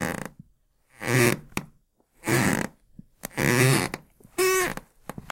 Some old floorboards creaking when I press on them. The creaks are clean and interims are almost noiseless so you can chop easily as you please. Recorded with a Roland Edirol R-09HR and edited in Audacity.